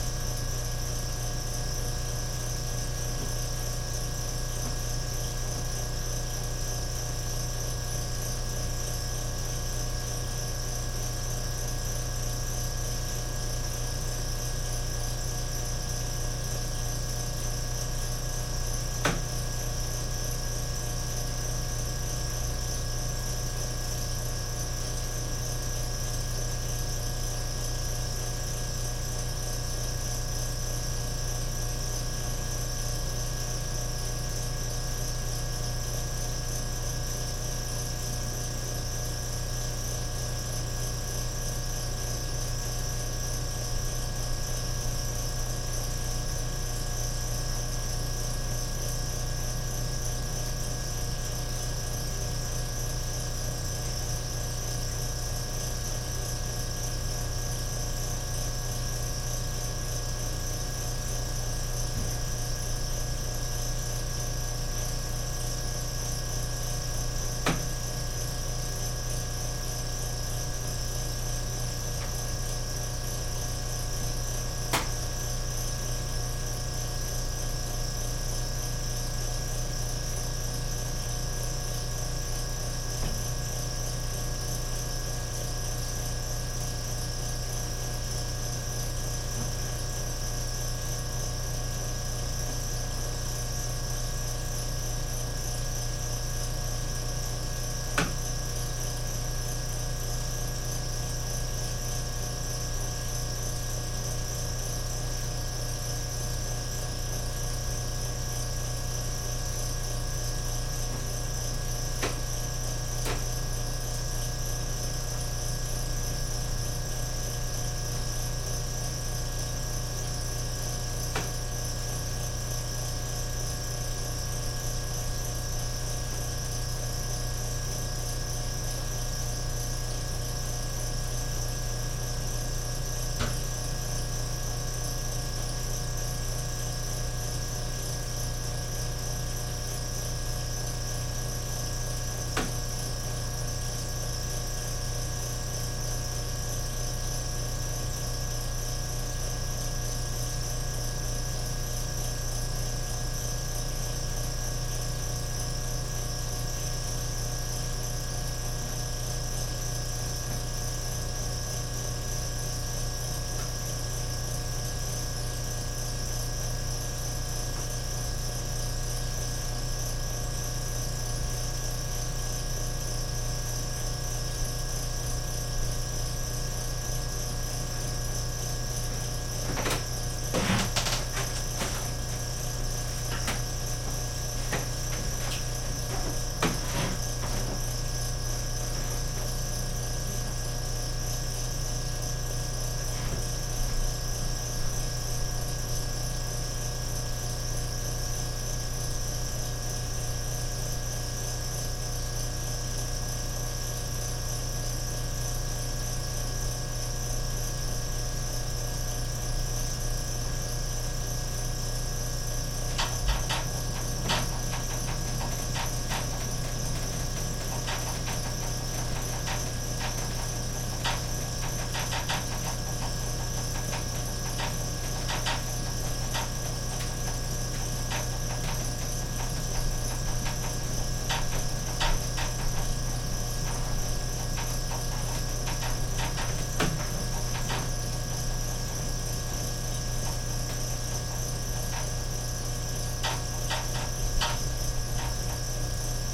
furnace propane pump humming rattle
furnace, hum, humming, propane, pump, rattle